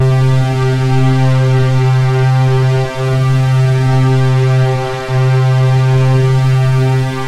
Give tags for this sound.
ensoniq; saw; unisono; vfx

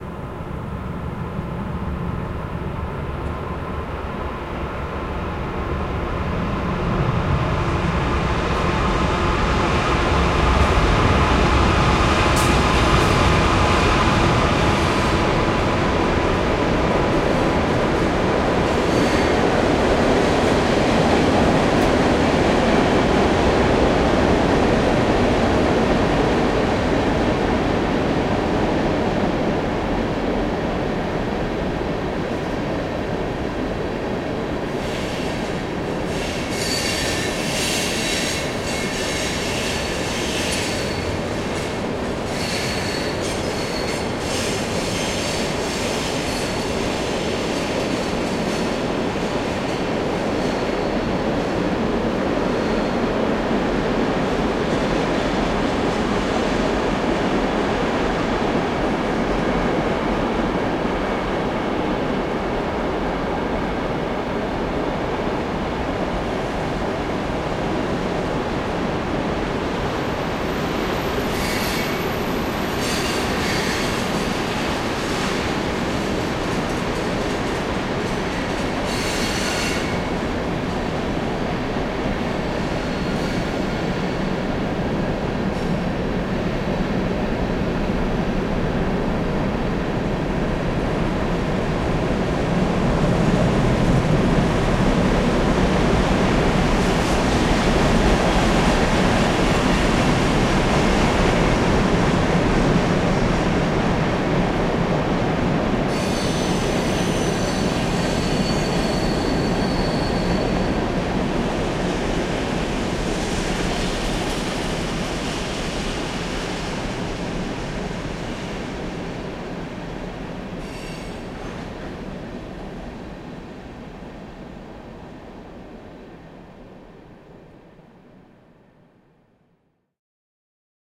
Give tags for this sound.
distant; noise; noisy; pittsburgh; rail; railroad; tracks; train; transportation

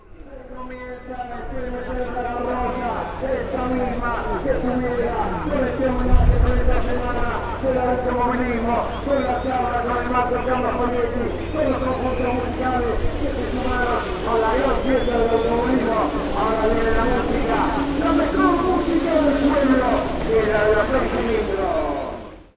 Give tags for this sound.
broadcasting; car; field; race; recording; zoomh4

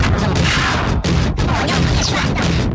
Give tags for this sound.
Crunchy; Distorted; Lofi; Odd

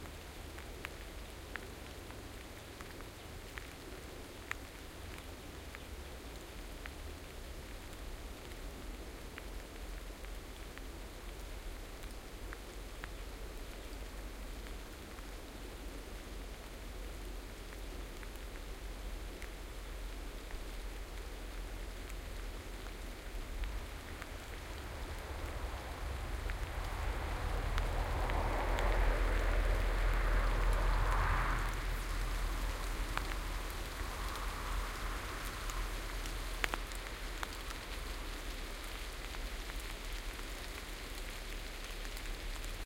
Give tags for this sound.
binaural; car; field-recording; forest; rain

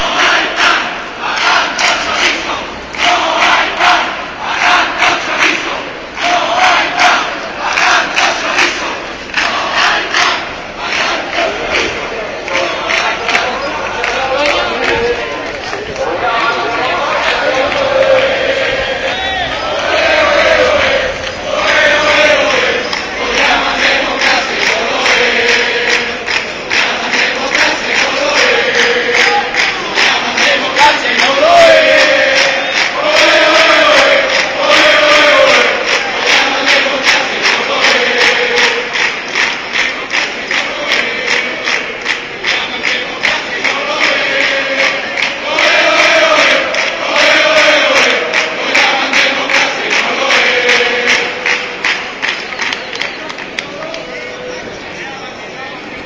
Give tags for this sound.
field-recording; slogan; shouting; people; spanish; protest; demonstration

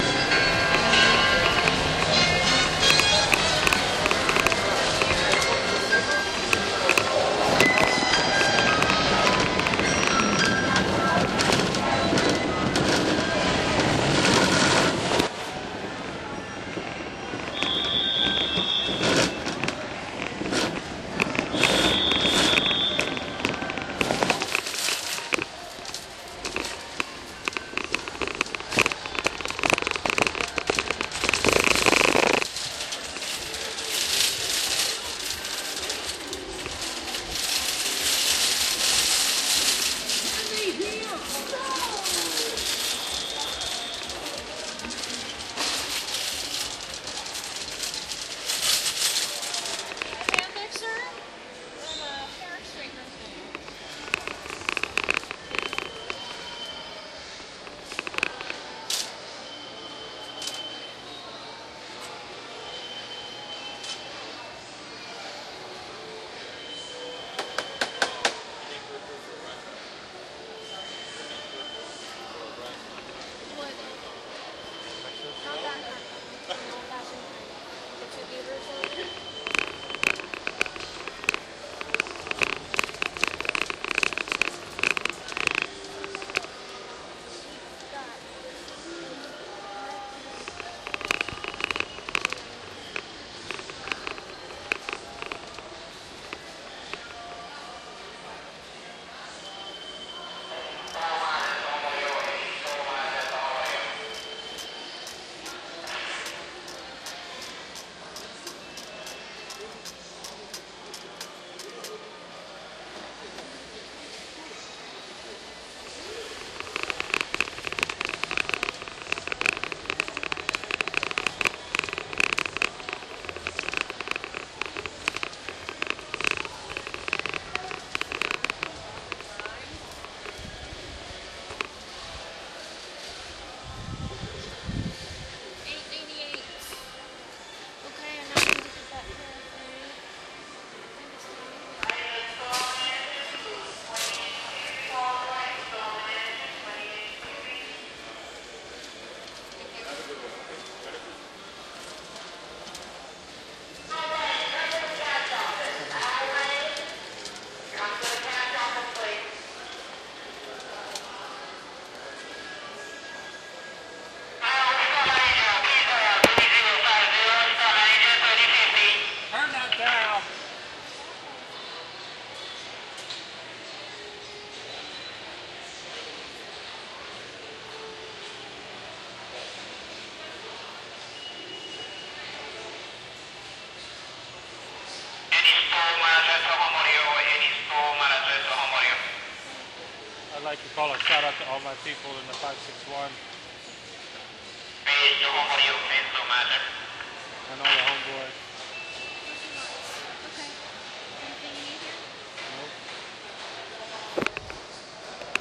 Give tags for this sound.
ambience; shopping

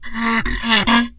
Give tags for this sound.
daxophone; friction; idiophone; instrument; wood

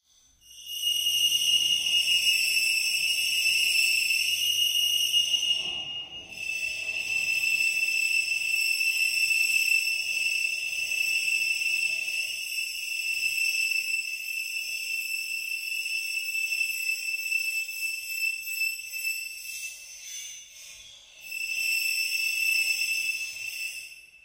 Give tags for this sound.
Alien; Creak; Eerie; Effect; Metal; Sci-Fi; Squealing; Stress

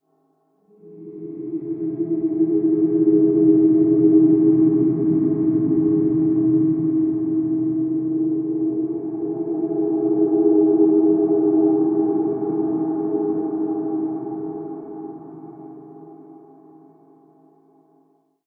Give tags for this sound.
artificial; drone; multisample; pad; soundscape; space

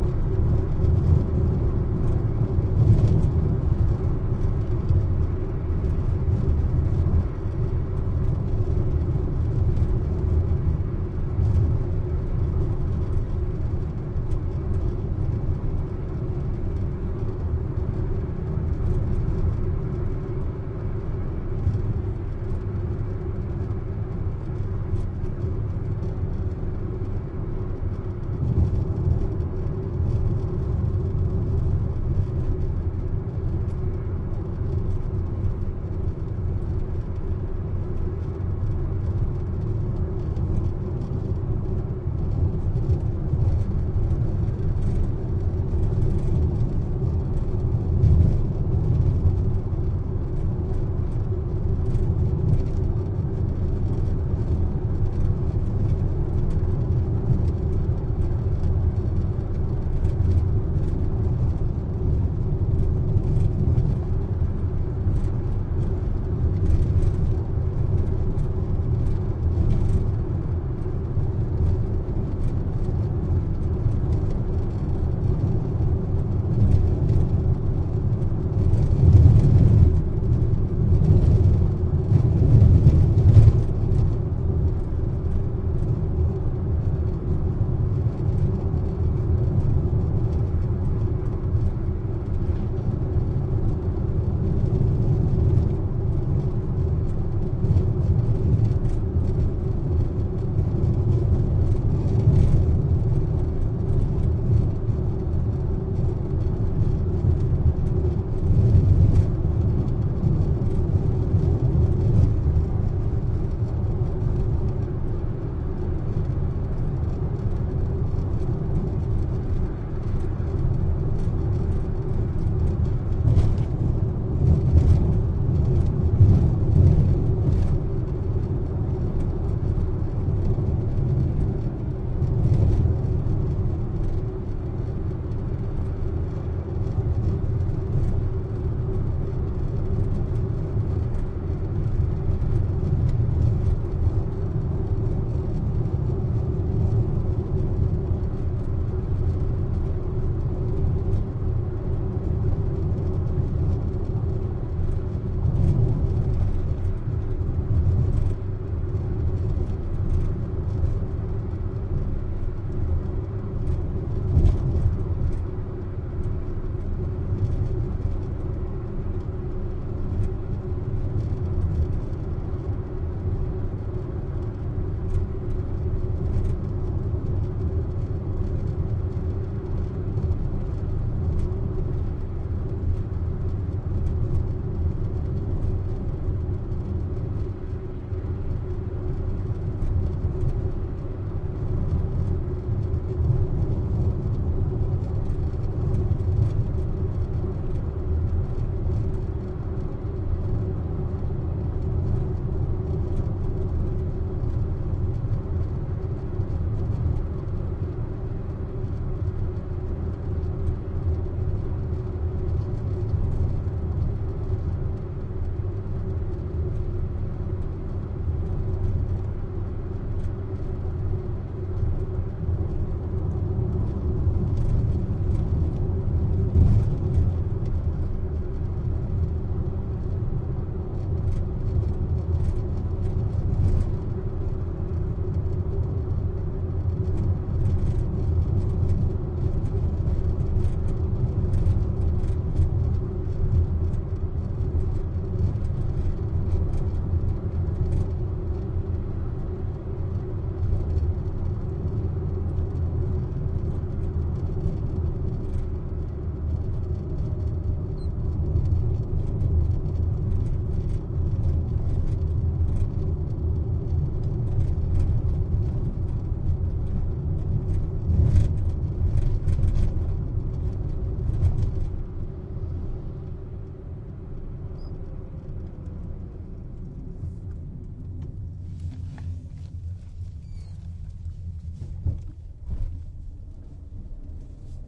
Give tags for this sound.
50kph; auto

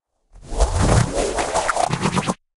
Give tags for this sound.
drone; engine; factory; futuristic; industrial; machine; machinery; mechanical; motor; noise; robot; robotic; sci-fi

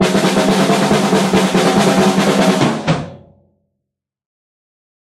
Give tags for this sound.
Bassdrum; Livedrums; Normal; Snare; Tom; Wirbeler

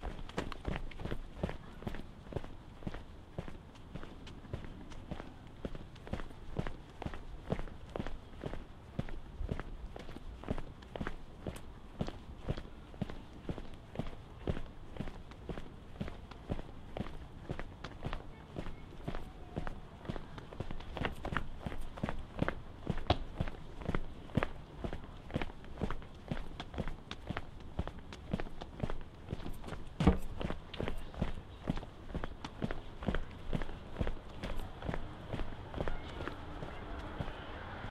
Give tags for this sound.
city; foley; footsteps; man; shoes; sidewalk

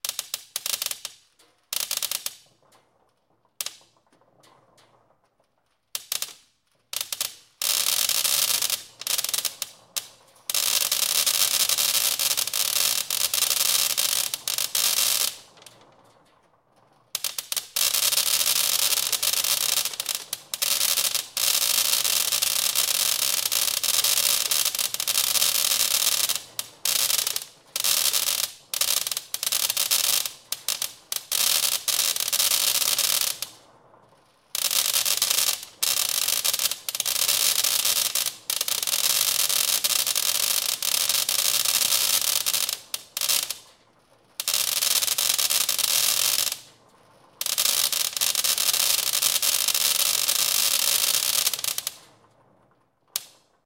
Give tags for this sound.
breaking-glass; break; indoor; window

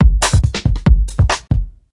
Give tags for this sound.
beat; dance; groove; loop; progressive

